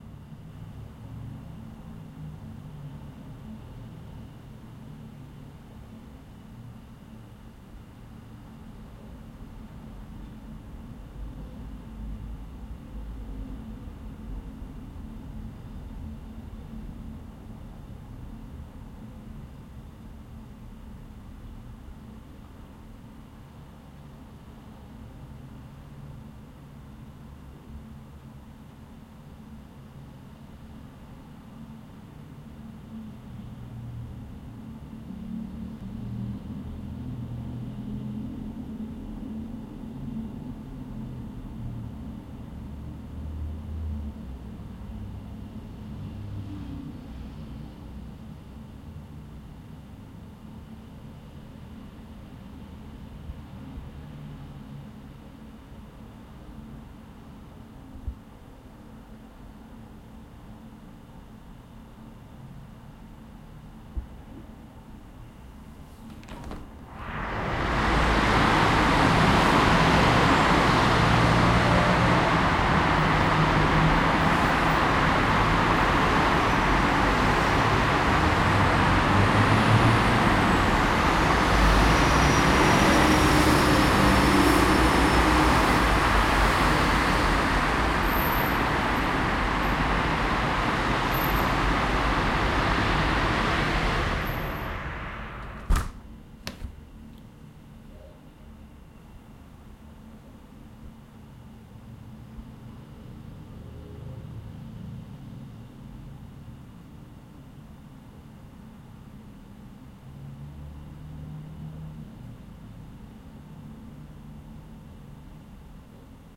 Heavy traffic from a window, closed then open
Heavy morning traffic in Rome, recorded behind a closed window. I open the window and after a while I close it. The window has double glasses so there is a huge difference between the two moments. Recorded with a Zoom H1.
ambience, cars, city, fi, field-recording, noise, street, traffic, window